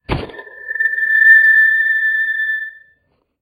A Blue Yeti microphone fed back through a laptop speaker. Microphone held real close to invoke feedback. Sample 1 of 3, medium pitch shifted down. Note that this sample has a thump at the beginning to make it sound like the microphone was dropped.